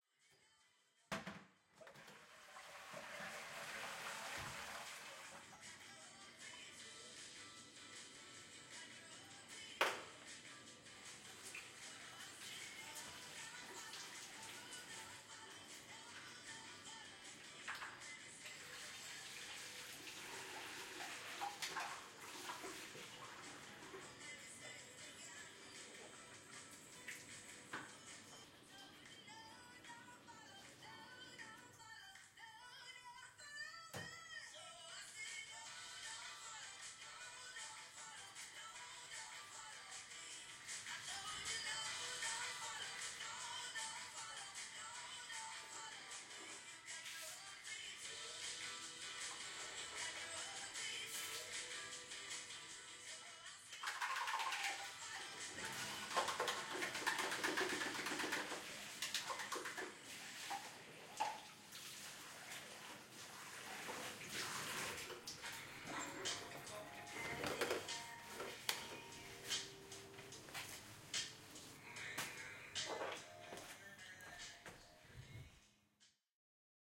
bathroom stereo _.
bathroom, field-recording, liquid, water